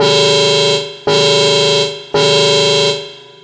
A small alarm sound I made using FL, hope you like it.
I hope this was usefull.
beep, danger, alarm, siren, scifi, space